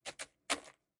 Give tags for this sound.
food
crumbling
cracker
foods
gingerbread
crumble
cookies
graham
pop
crackers
crumbles
sound
footstep
steps
effects
gamesound
sounddesign
sfx

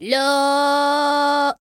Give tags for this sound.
singing
dumb
voz
cantando
voice
femenina
female

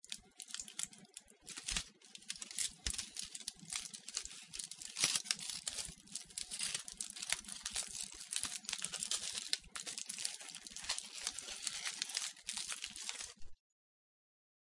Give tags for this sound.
magia
magician

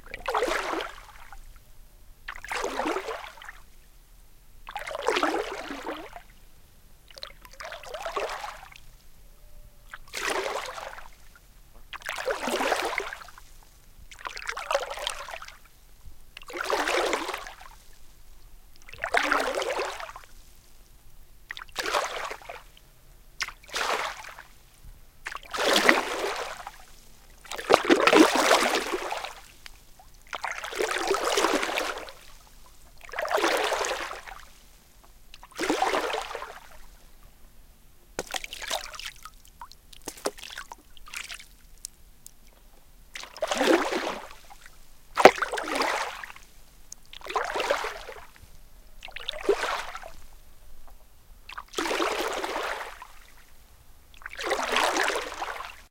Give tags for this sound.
rowing
water
river
field-recording
nature
near
pond

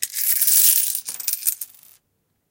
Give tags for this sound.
jingle
money

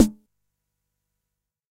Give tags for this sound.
jomox
drum
snare
xbase09
909

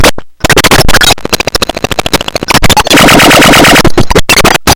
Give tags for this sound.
bending
circuit-bent
coleco
core
experimental
glitch
just-plain-mental
murderbreak
rythmic-distortion

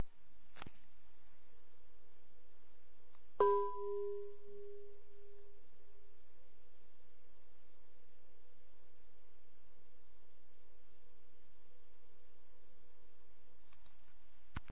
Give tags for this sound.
bowl
finger
glass
striking

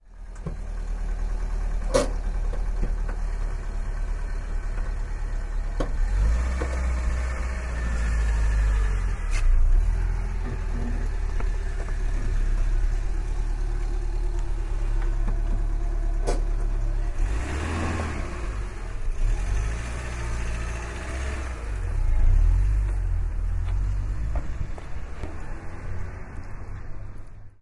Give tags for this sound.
daewoo
sound
coche
desaparcar
lanos
espa
car
elsodelescultures
motor
negro
spain
filipino-community
intercultural
casa-asia
barcelona
a
black

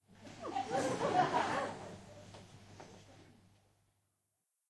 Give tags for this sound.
audience
auditorium
crowd
czech
laugh
prague
theatre

